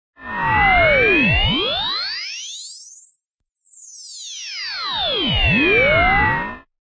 A warpy sound for alien abductions, giant lasers, etc. Made with Harmor + ring modulation in FL Studio.